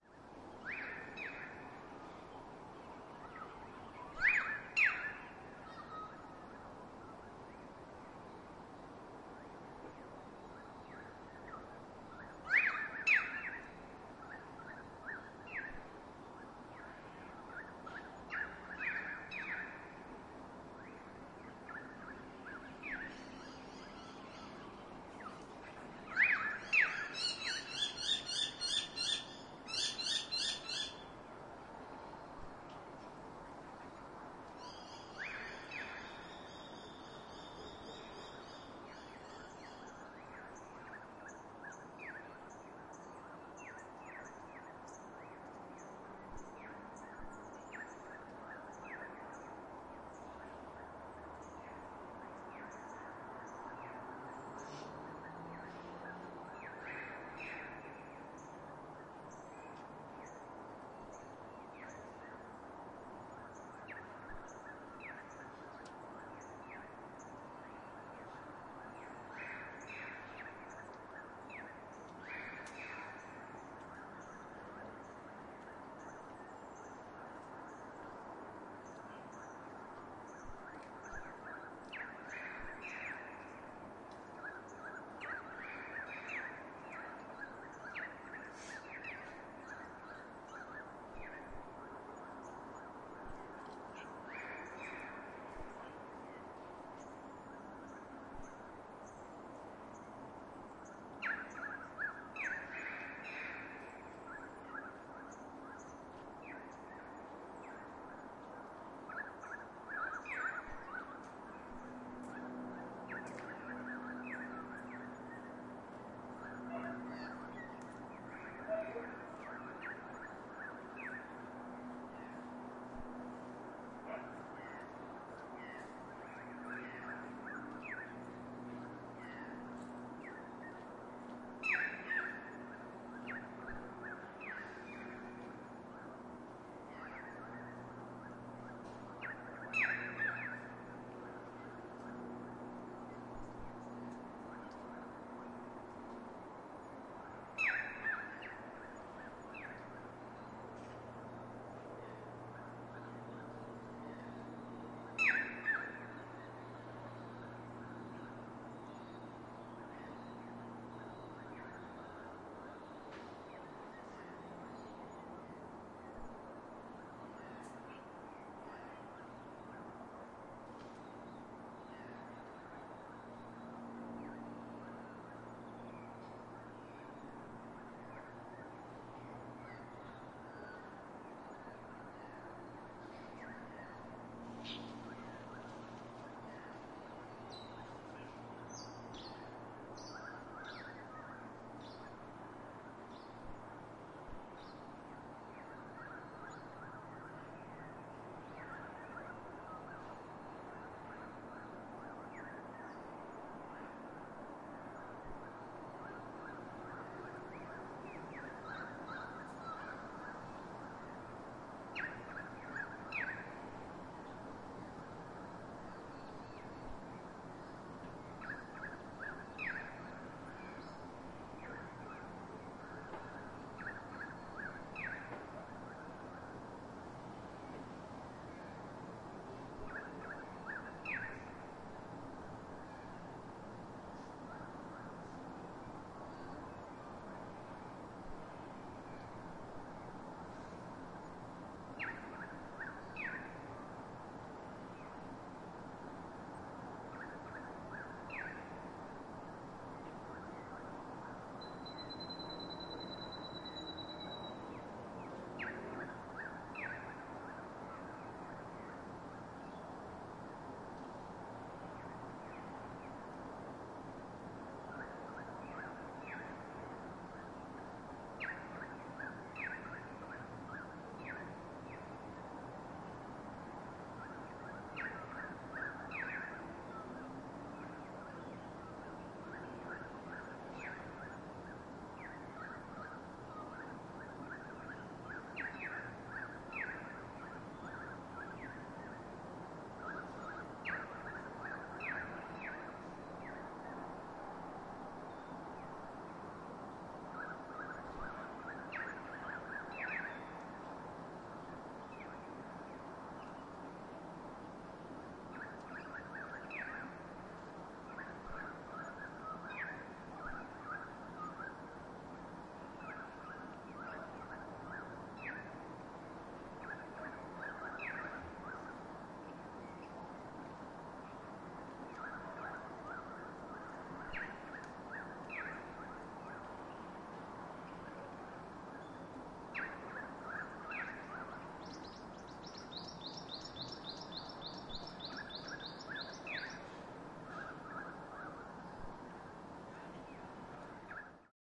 This sound recorded around 3pm from the deck of a suburban house in Canberra, Australia. In it you can primarily hear birds, but in the background you will make out the occasional car and airplane.